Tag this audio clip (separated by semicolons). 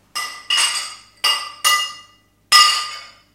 clashing; glass